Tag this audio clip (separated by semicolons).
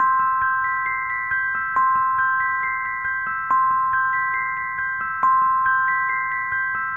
ambient; atmosphere; childlike; cute; fender; piano; rhodes